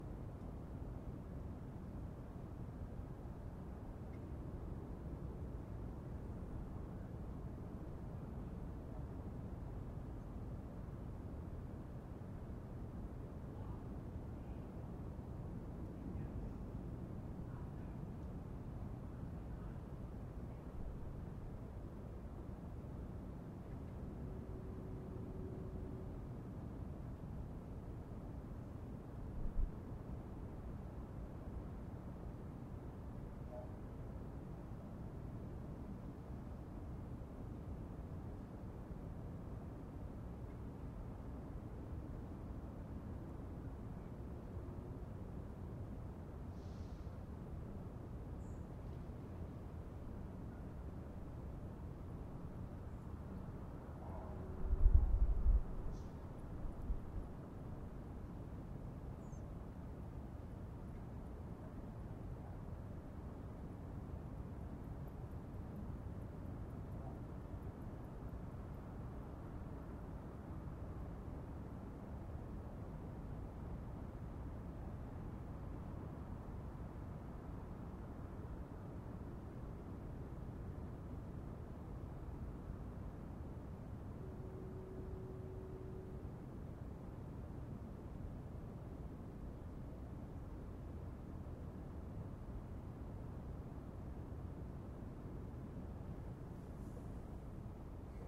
Ambience street binaural
Quiet San Francisco neighborhood at night. Some cars and people talking can be heard faintly.
ambiance, binaural